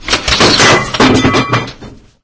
Yes It's just things falling